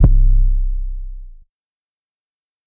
Sorrow State zay 808
Nice Zay style 808 I made and never used
metro, kick, percs, Zaytoven, sorrowstate, kenny, beat, loop, drum, short, loud, quantized, 808, beats, murda, murdabeatz, drums, trap, hard